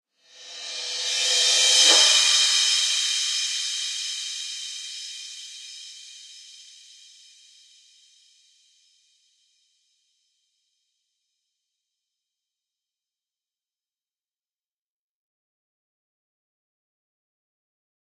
Reverse Cymbal
Digital Zero